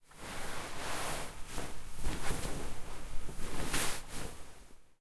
Blanket Movement 5
A down doona/duvet being rustled. Stereo Zoom h4n recording.